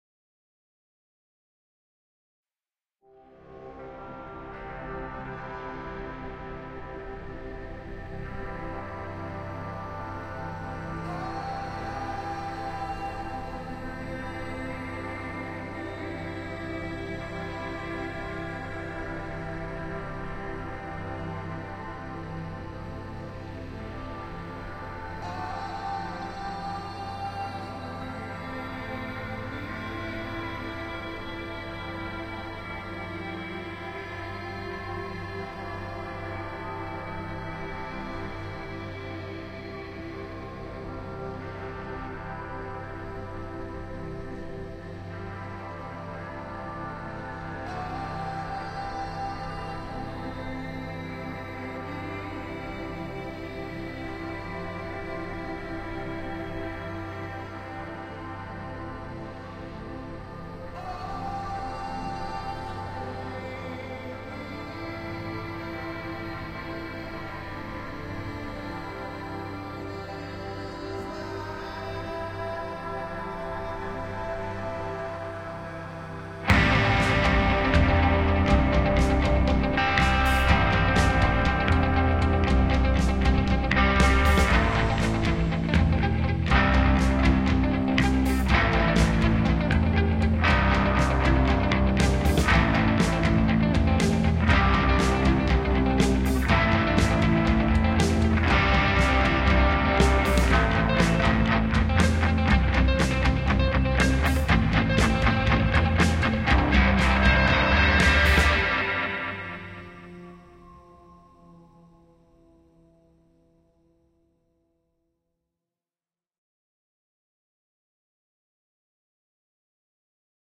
Ambient Space Sounding Track
Ambient, background, cinematic, cinimatic, dramatic, film, free, track